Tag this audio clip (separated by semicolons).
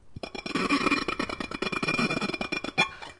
baking-dish; glass; kitchen; pan; percussion; pyrex